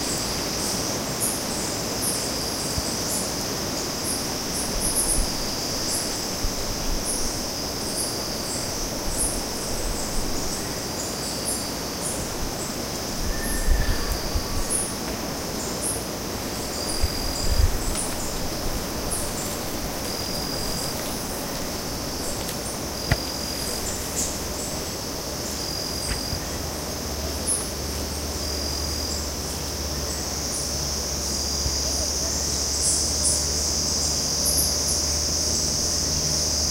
Costa Rica 6 Jungle Birds Insects
summer, hike, birds, central-america, insects, jungle